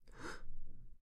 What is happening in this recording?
This audio represents the sound of a woman sighing.
Sound, Woman